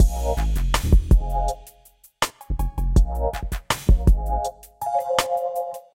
SMOOTH VIBE MUSIC LOOP
musicloop, keys, drums, loop, snipet, beat, vibe, smooth, music, band, bass